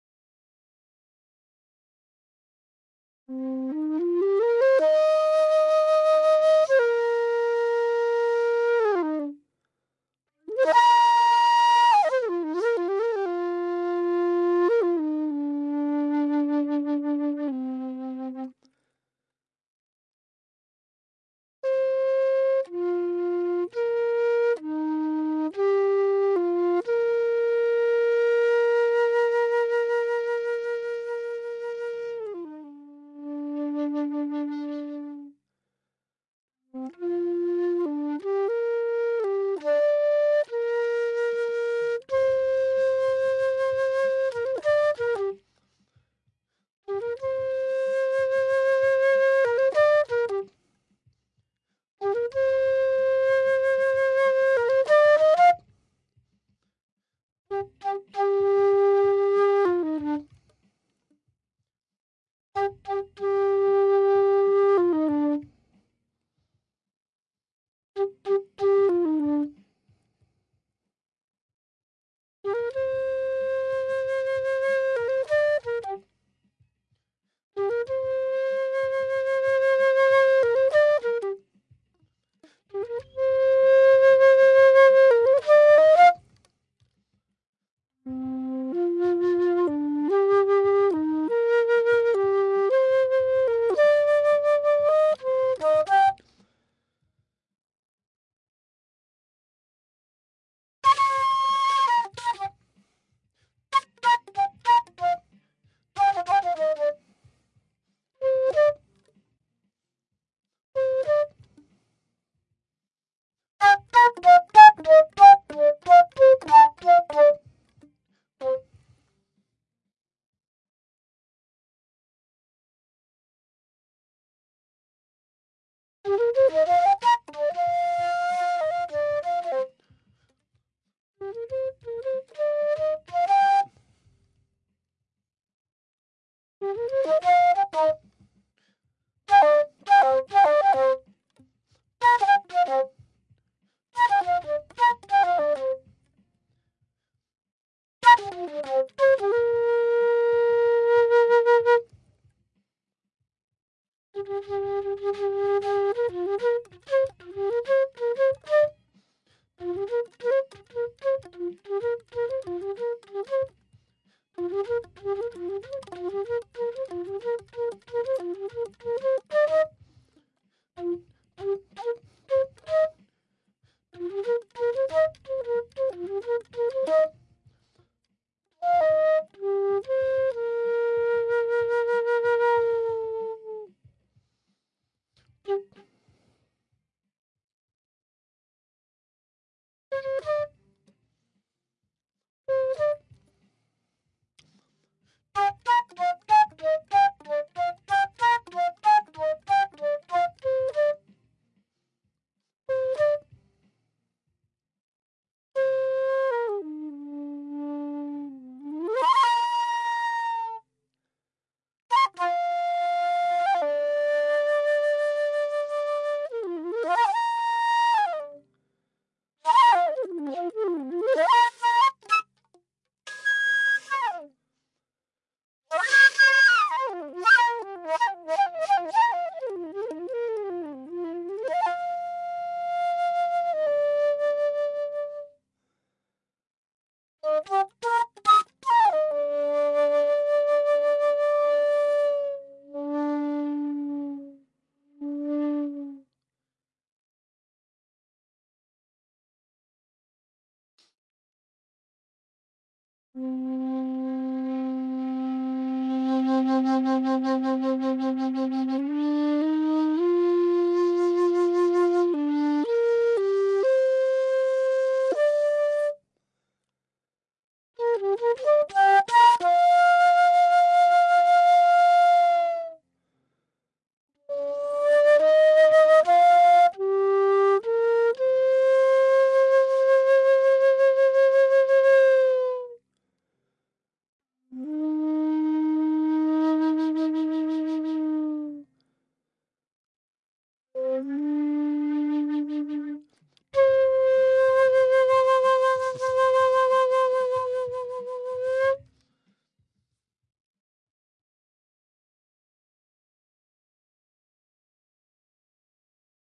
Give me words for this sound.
floot toots 1
This is a recording of my flute playing recorded direct to Cubase with a Sennheiser mic. If you'd like to use it for something, I'd love to know the outcome. Keep me posted.
ambient, cool, dry, flute, mellow, music, whistle